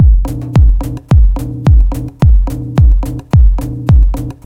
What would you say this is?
dr loop 014A 108bpm
108bpm, drums, loop